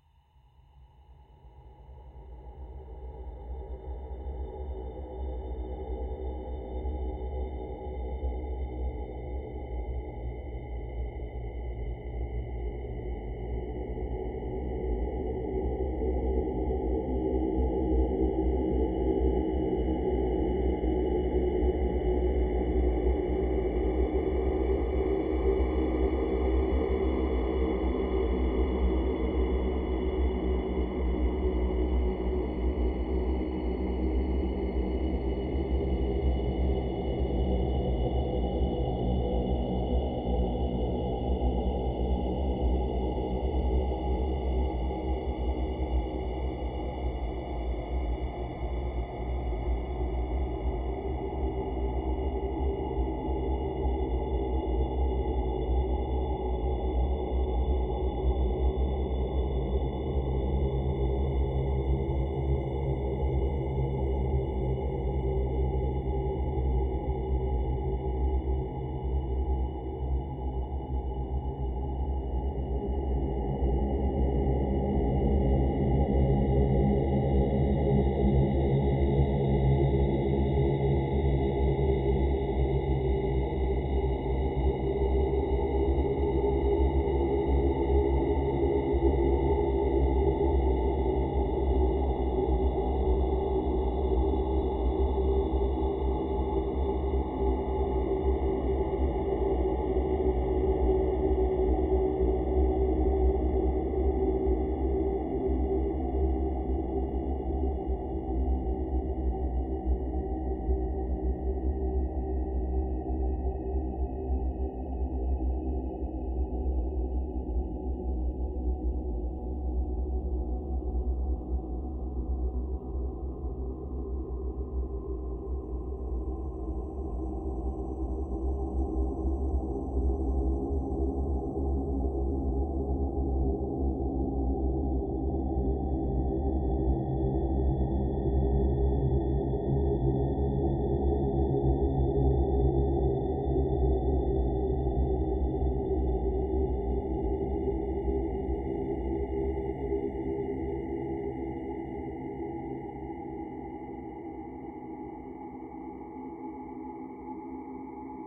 Spooky Ambiance #1
I recorded me just saying 'OOOOOOOOOO' using Audacity, with effect from Sound Blaster Z's Voice FX.
Useful for Spooky places.
spooky, ambiance, haunted, horror